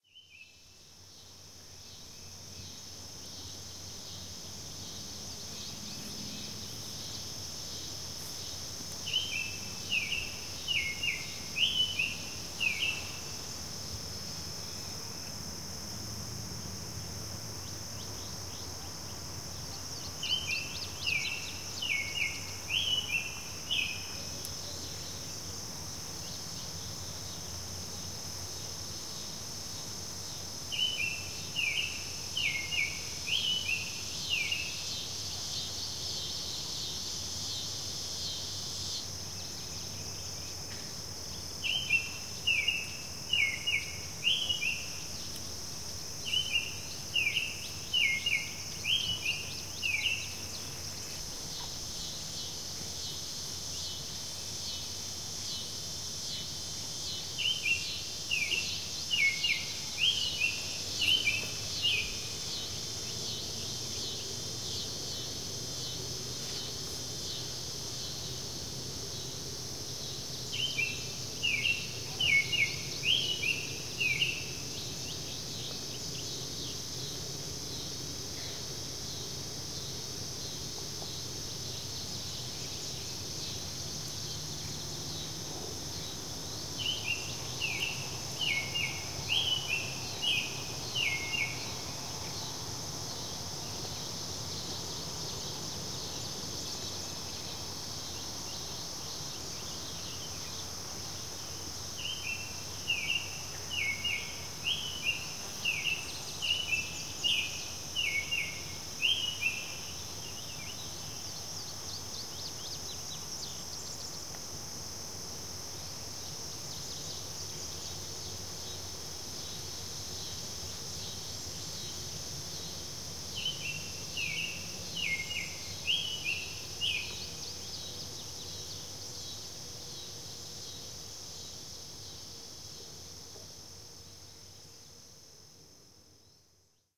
A wonderful simple but nice song of the all-red Summer Tanager. Recorded in June with the everpresent insects. Recorded with Stereo Samson CO-2 microphones into a Handy Zoom H4N recorder. If you listen closely - preferably with headphones - you can hear the slight echo.
ambience, birds, field, Field-recording, forest, nature, summer, tanager, warbler, woods